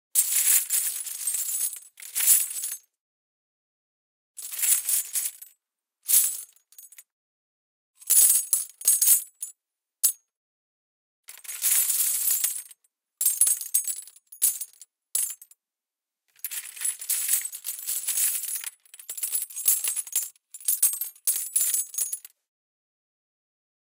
bullet cases
Lots of bullet shells
bullet, case, gun, shell, weapon